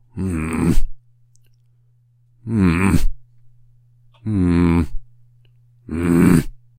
Me trying to pass myself off as a disapproving academic authority figure